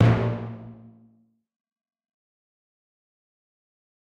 A clean HQ Timpani with nothing special. Not tuned. Have fun!!
No. 7